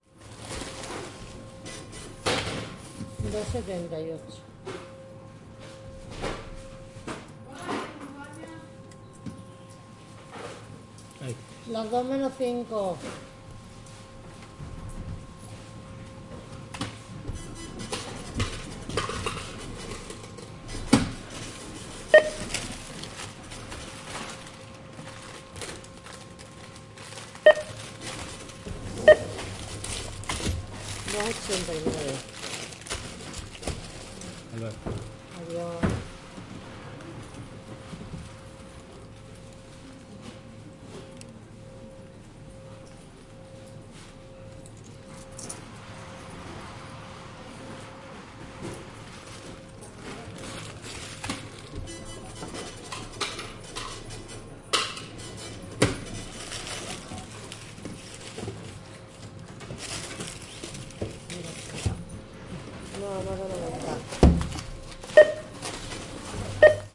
0247 Supermarket paying 2
Supermarket. People talking in Spanish. Beep from the cash machine.
20120326